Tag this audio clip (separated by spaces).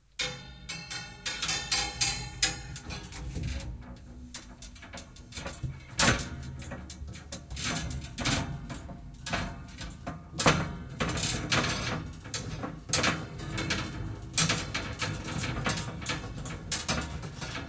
creepy horror poltergeist uncanny